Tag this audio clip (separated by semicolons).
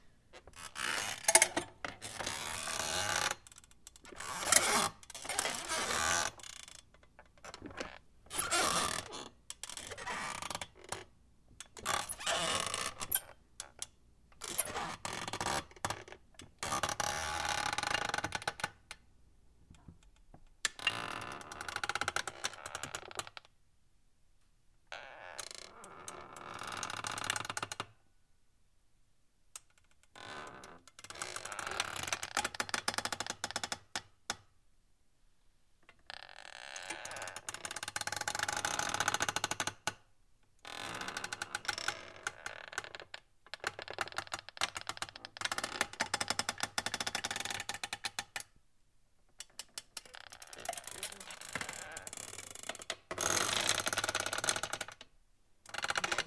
ship; wooden-ship; pops; popping; squeak; wood-creak; creaking; wood-creaking; creak; groan